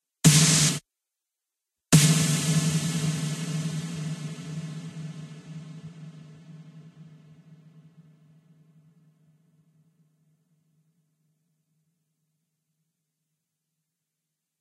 SNARE (House)
This is a snare I made using a preset snare from FL Studio, modified, mixed and mastered on my own.
snare, big, dubstep, house, room